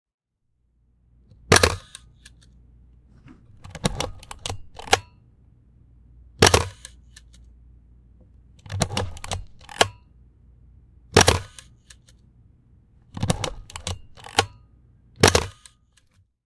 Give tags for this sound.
camera; click; film; film-camera; gear; kodak; mechanical; photography; picture; shutter; slr; vintage

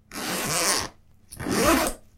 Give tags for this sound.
open; inventory; close; Backpack; bag

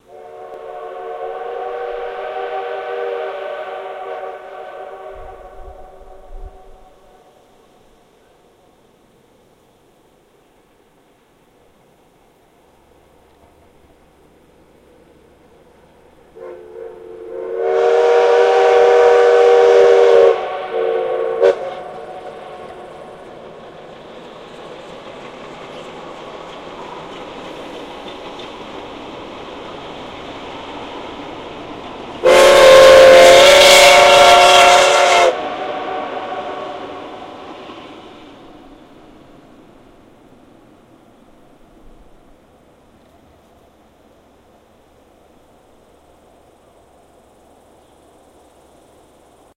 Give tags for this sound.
steam; whistle; train; transport

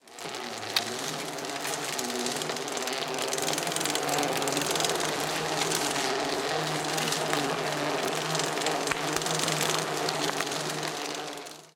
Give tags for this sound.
ride; sport; riding; extreme; bike; bicycle; bmx

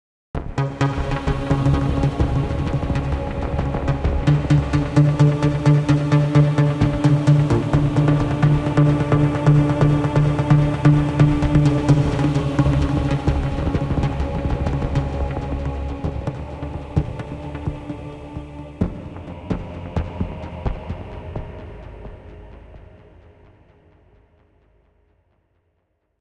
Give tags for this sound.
horse; sound; King; Dog; pizza; electric; EL

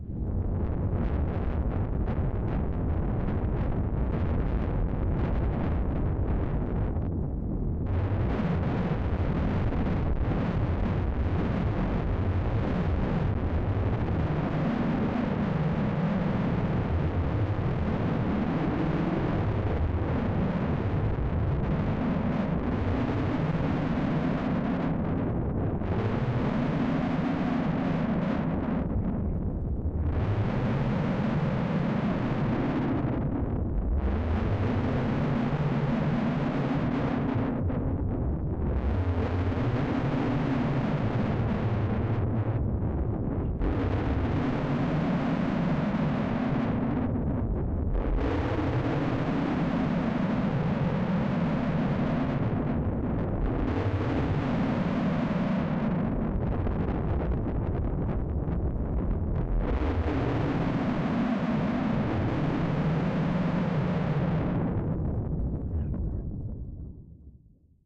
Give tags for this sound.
thunder; effect

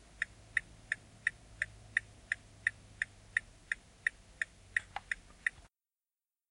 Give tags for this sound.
tac; Elec; Tica

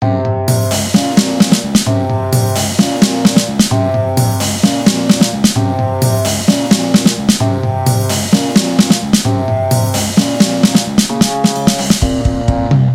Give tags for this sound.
audio-library; background-music; download-background-music; download-free-music; download-music; electronic-music; free-music; free-music-download; free-music-to-use; free-vlogging-music; loops; music; music-for-videos; music-for-vlog; music-loops; prism; sbt; syntheticbiocybertechnology; vlog; vlogger-music; vlogging-music; vlog-music

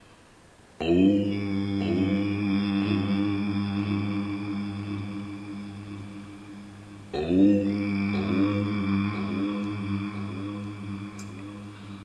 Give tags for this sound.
3o; ahm; Om

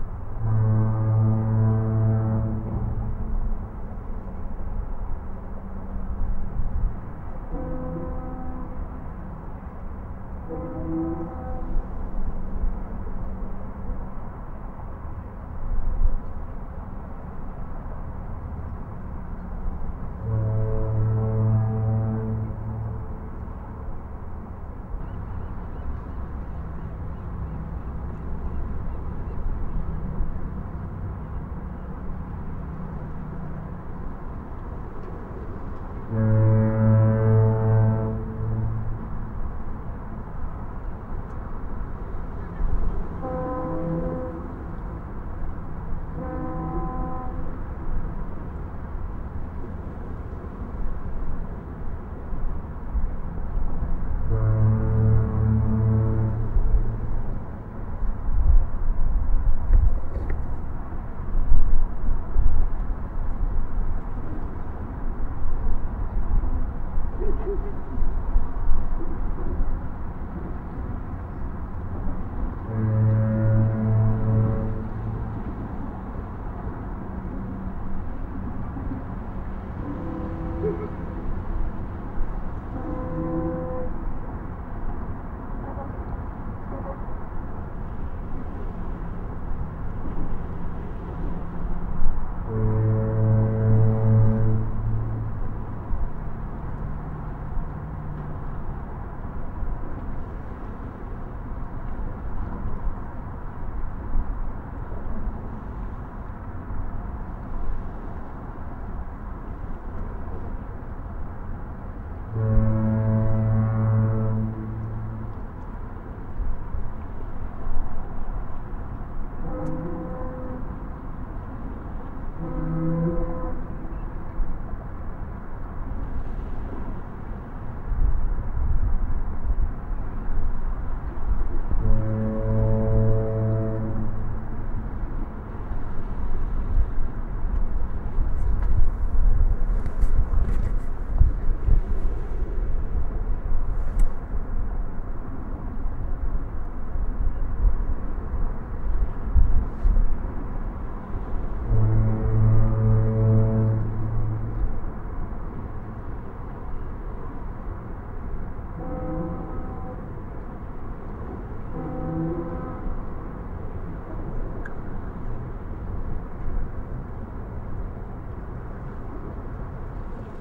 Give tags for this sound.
fog-horn; san; francisco; fog

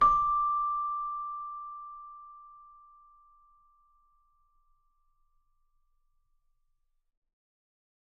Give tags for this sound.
bell; celesta; chimes

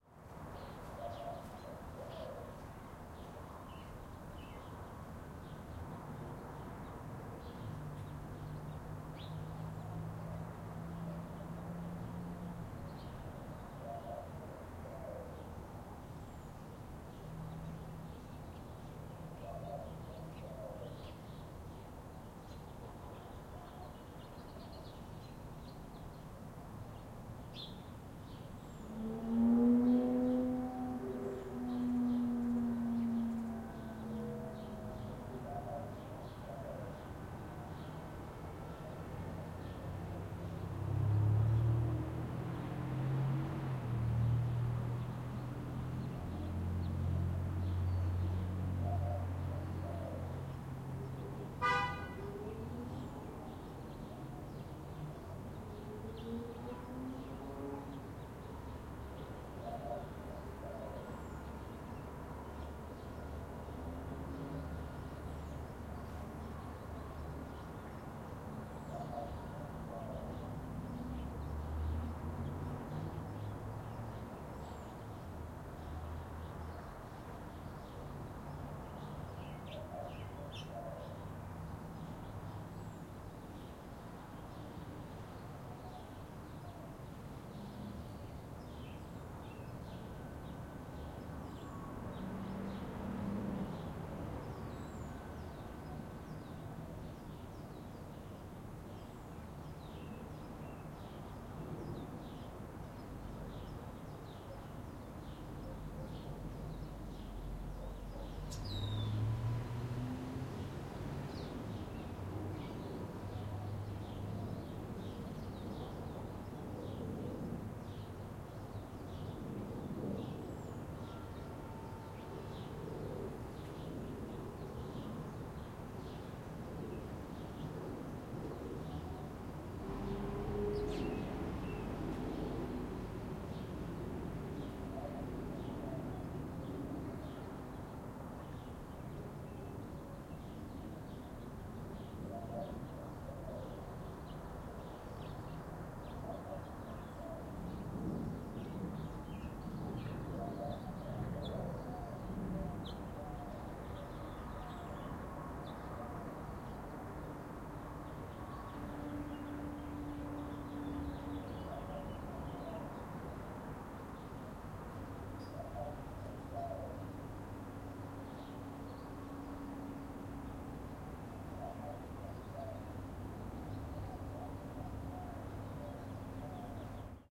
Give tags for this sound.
rain; ambience; quad; atmosphere; exterior; background

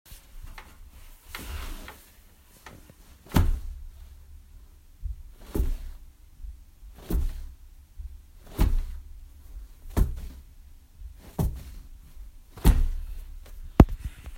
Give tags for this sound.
kick; fall; Thump; bump; Thumping

